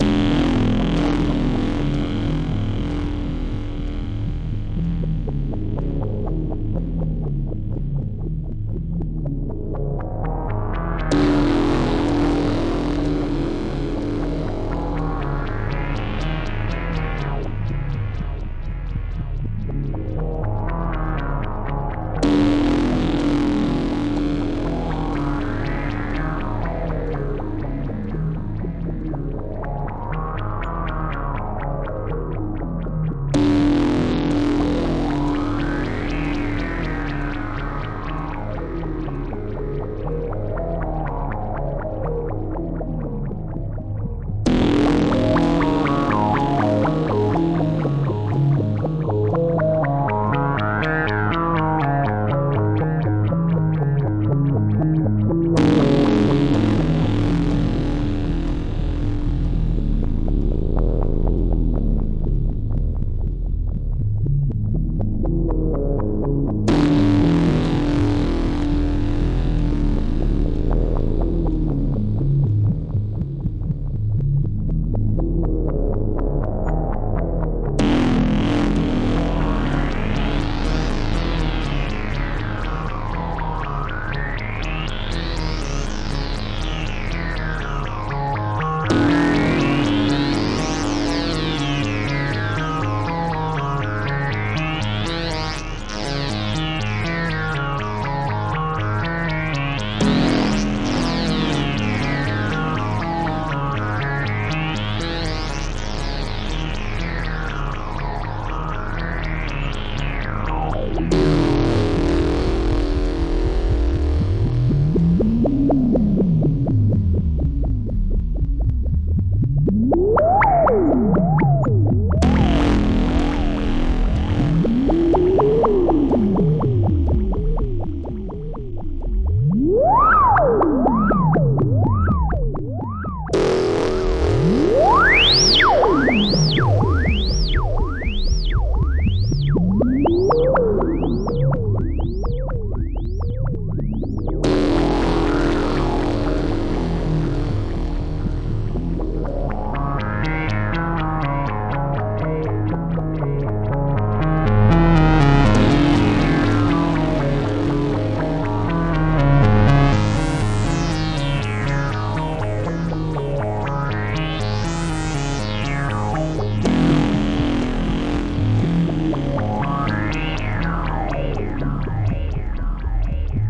Mechanisms 2 mgreel -Messin’ with Mother And Her Robot Friends
2 minute 53 second reel from my track “Messin with Mother And Her Robot Friends” from my album Mechanisms 2. This reel has 3 splices.
synth, morphagene, mgreel, Machina, HyperEx, mechanisms, modular